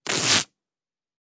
mix of velcro being ripped. moderately slow.